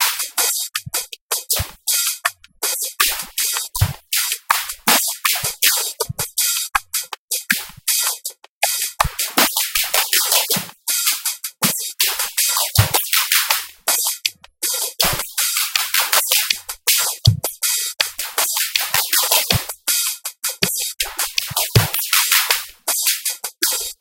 80 bpm ATTACK LOOP 2b mastered 16 bit
This is a drumloop at 80 BPM which was created using Cubase SX and the Waldorf Attack VST drumsynth.
I used the acoustic kit preset and modified some of the sounds.
Afterwards I added some compression on some sounds and mangled the
whole loop using the spectumworx plugin. This gave this loop a phased bandpassed sound in which the low frequencies are missing.